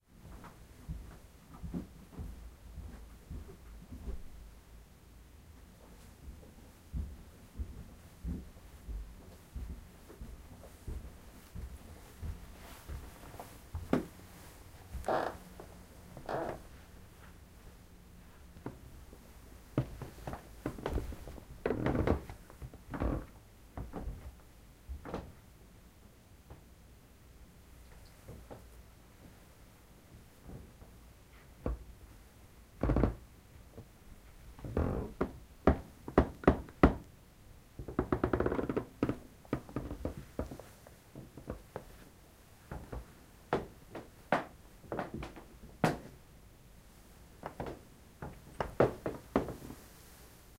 Creaking floor upstairs
As soon as I got back home I decided to record some more sounds for dare-12. These are sounds of my house that I have been wanting to record for a long time.
The upstairs floor is really creaky in places. This is the sound of me walking up the woodden stairs and then walking around upstairs.
Both the staris and the upstairs floor are carpeted.
recorded with a Zoom H1 recorder. The recorder was placed on the floor upstairs.